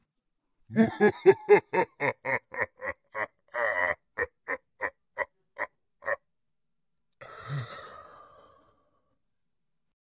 low laugh
low male laugh